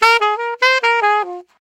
DM 150 F# SAX RIFF
DuB HiM Jungle onedrop rasta Rasta reggae Reggae roots Roots
HiM, Jungle, roots, rasta, DuB, onedrop, reggae